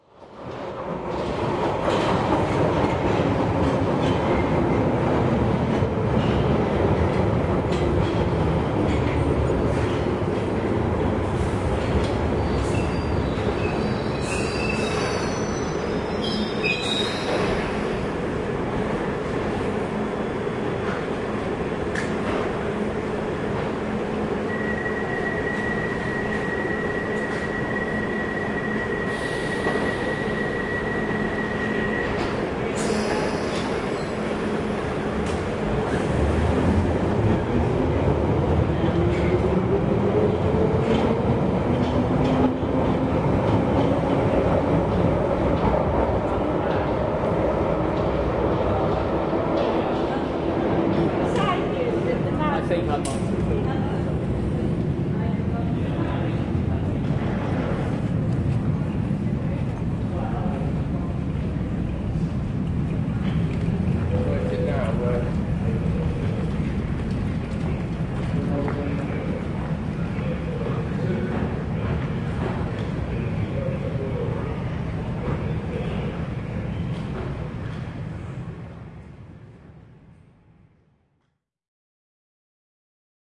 808 Kings Cross Underground 3
A tube train arrives and leaves. Recorded in the London Underground at Kings Cross tube station.
tube, london, field-recording, london-underground